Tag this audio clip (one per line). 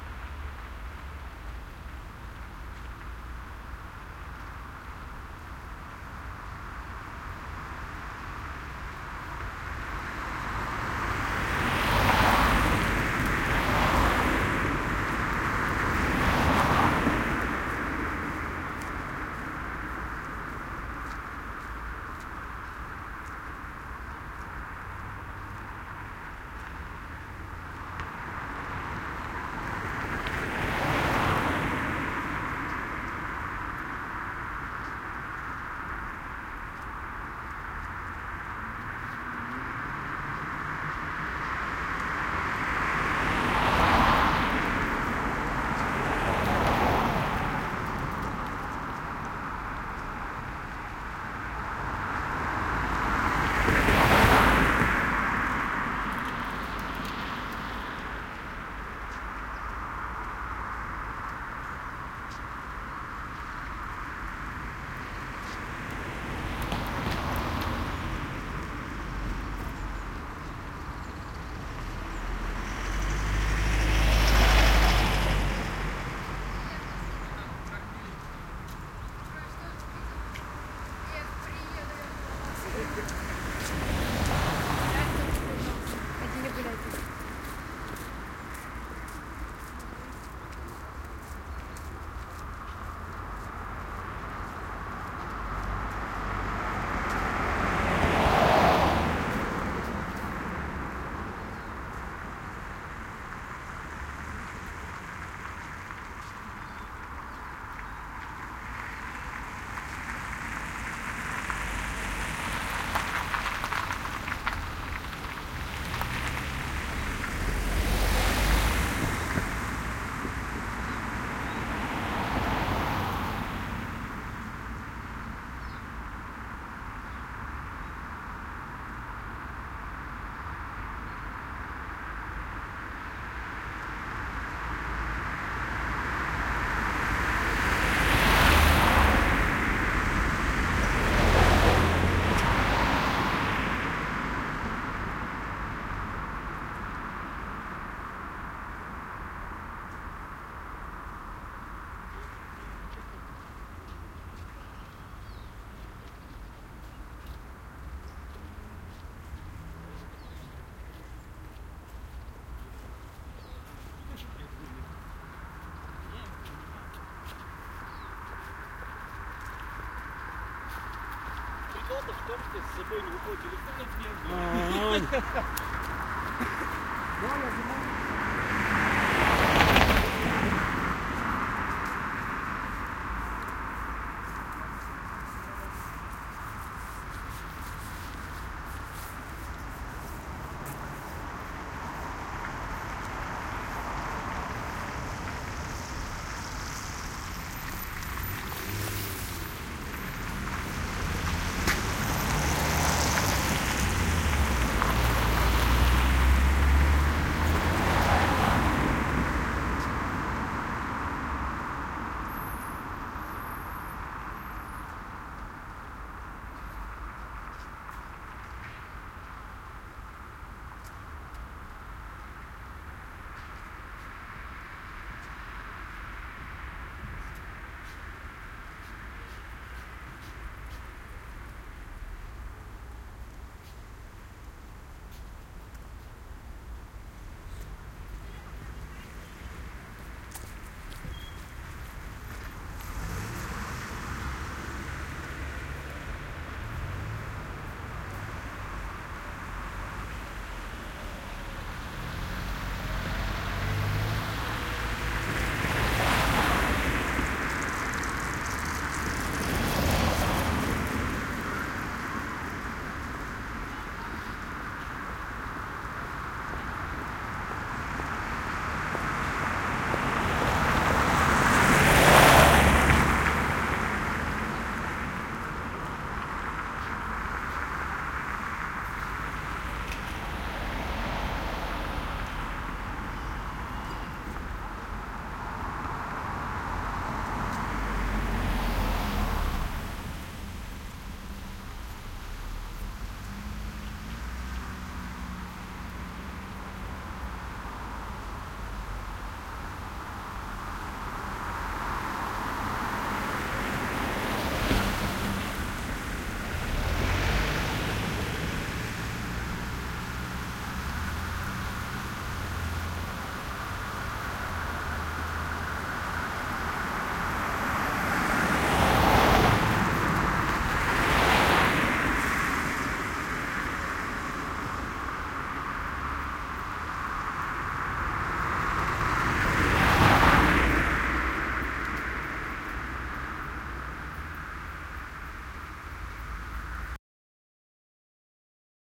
ambiance
ambience
autumn
binaural
city
field-recording
moscow
suburban
traffic